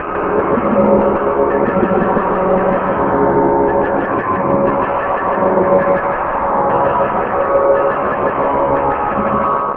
Stereophonic. These samples are supposed to sound LIVE, not studio produced.